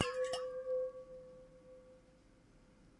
Megabottle - 20 - Audio - Audio 20
Various hits of a stainless steel drinking bottle half filled with water, some clumsier than others.
bottle, hit, ring, steel, ting